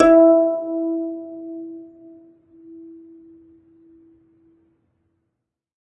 single string plucked medium-loud with finger, allowed to decay. this is string 17 of 23, pitch E4 (330 Hz).